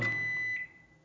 microwave end sound
beep, sound, beeping, microwave